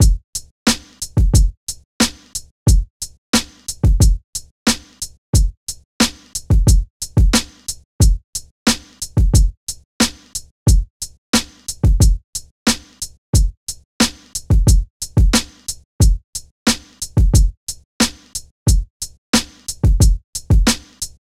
BoomBap Drums 90 BPM
samples, chill, music, sample, 76